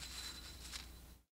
Tape Misc 4
Lo-fi tape samples at your disposal.
collab-2, Jordan-Mills, lo-fi, misc, mojomills, tape, vintage